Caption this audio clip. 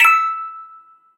metal cracktoy crank-toy toy childs-toy musicbox